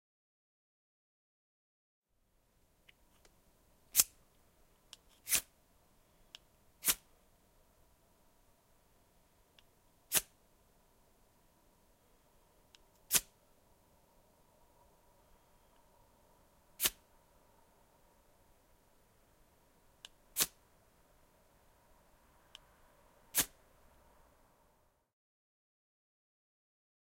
05 Flint and steel
Striking of the flint and steel.
survival, CZECH, Panska, fire, flint, CZ, steel